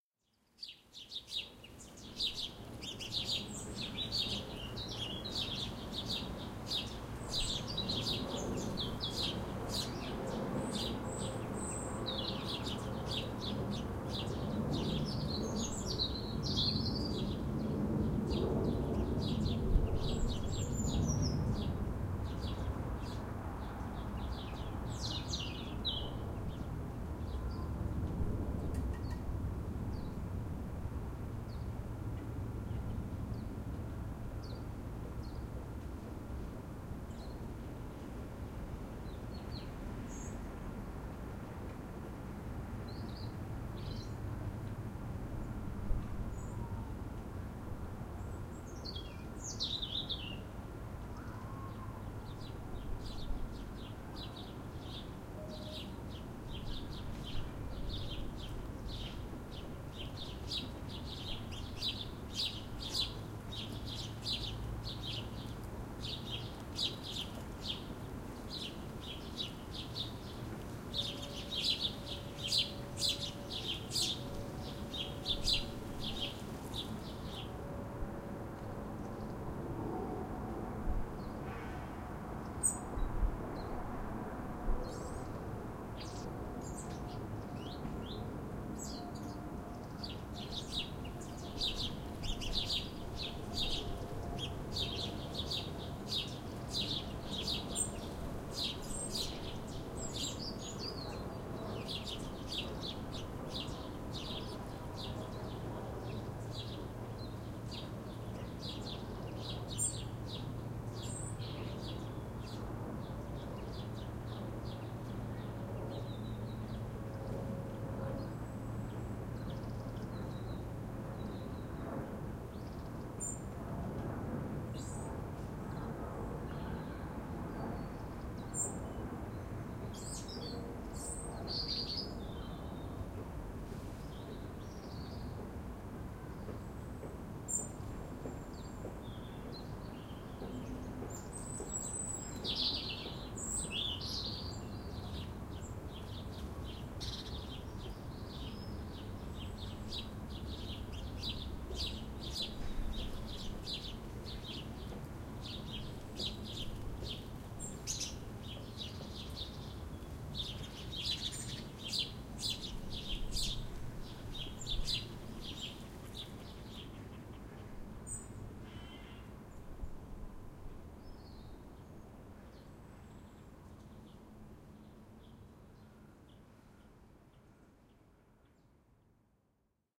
19 Urban Background Sound
I made a series of recordings of urban sounds from my open living room window between late July and early September 2014. These recordings were done at various times of the day.
I am using these as quiet background ambiance on a short play due to be performed in the near future. Recorded with a Roland R26.